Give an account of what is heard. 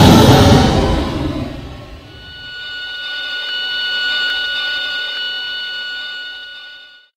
An explosion followed by a flaegeolet on a violin. Used in POLAR.
ambient, bomb, boom, explosive